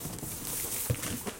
Cardboard Box Rustle 1
A friend moving his hands around a cardboard box. The box had tape on it, hence the slight rustle.
box, cardboard, crumpling, duct, package, rustle, rustling, tape